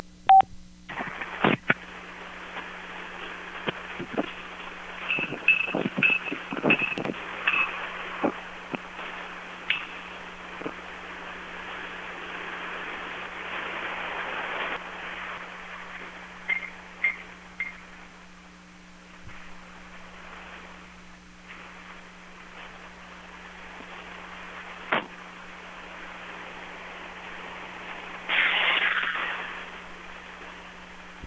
field-recording
Recorded on Samsung phone walking towards a construction site. Using phone only and then recorded with magnetic pickup into cool edit and mastered.
cell, test, phone, lofi, field-recording